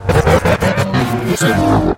Another transformer sound
artificial, computer, design, digital, electric, electromechanics, fantasy, fx, machine, mechanical, robot, science-fiction, sound, transformer